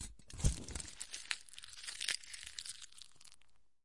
Handling, crinkling, crunching, and tearing pieces of aluminum/tin foil.